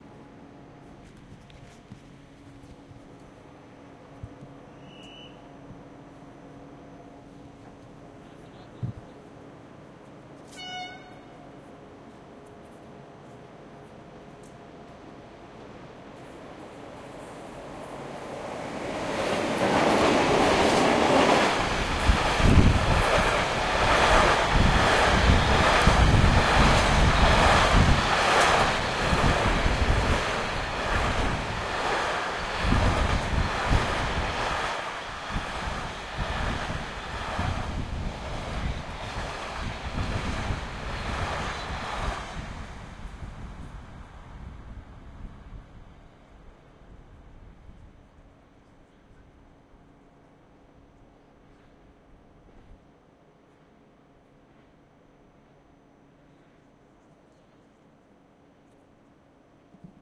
Train Passing By 2
A train Passing by Larissa Station (Greece) august night.
pass, rail-way, steam-locomotive, rail, passing, Trains, rail-road, locomotive